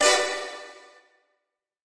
trompetas del norte